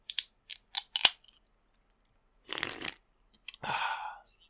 Drinking SFX (Can)
Opening and drinking an aluminium can of pop
Software: Audacity
Microphone: Audio Technica ATR2100
Can, Container, Drink, Opening